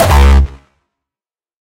Hardstyle Kick C#3
a Kick I made like a year ago. It has been used in various tracks by various people.
909, access, c, dong, drumazon, hardstyle, harhamedia, kick, raw, rawstyle, roland, sylenth1, tr-909, virus